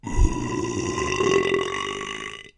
An intense burp.
loud, belch, burp, disgusting, gross